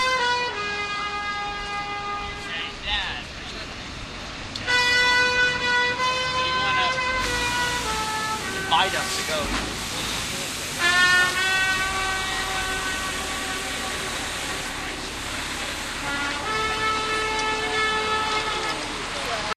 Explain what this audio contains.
washington steettrumpet
A street musician plays the trumpet on the corner of 4th St and Jefferson Drive recorded with DS-40 and edited in Wavosaur.
washington-dc, field-recording, travel, road-trip, summer, vacation